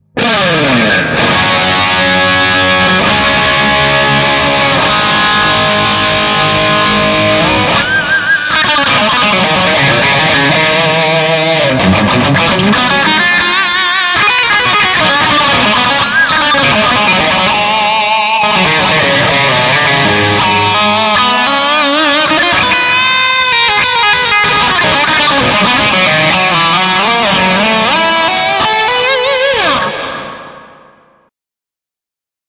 hot hot licks
super heavy distortion with tons of reverb and delay. Total arena rock vibe with the lead guitar player taking the spotlight. Think EVH and other guitar overlords throwing down the licks for all to hear.